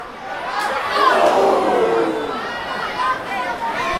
Soccer stadium Oehh 2
Field recording of a Dutch soccer match at the Cambuur Stadium in Leeuwarden Netherlands.
football, footballmatch, Field-recording, soccermatch, public, match, soccer, crowd, stadium